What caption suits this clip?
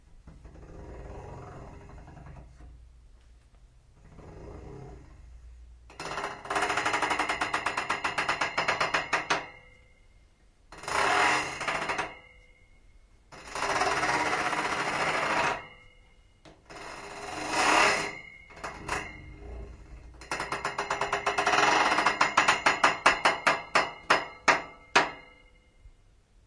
playing the heater3
I get a new heater so I tried how it sounds. It's a really great drum instrument. Recorded with Zoom H1
heater, music, play